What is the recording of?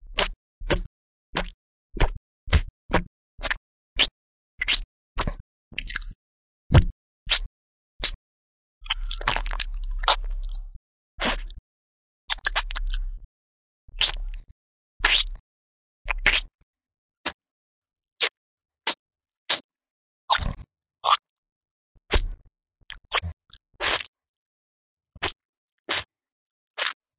Mouth Saliva Sounds
Recording of mouth making multiple short saliva sounds.
Sticky, Mouth, Saliva, Spit, Chew, Goo, Short, Lips